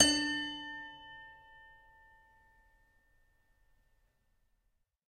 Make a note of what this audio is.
multisample pack of a collection piano toy from the 50's (MICHELSONNE)
piano, toy, michelsonne, collection